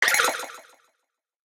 Retro Game Sounds SFX 47
Shoot, Sounds, effect, fx, gameaudio, gamesound, pickup, shooting, sound, soundeffect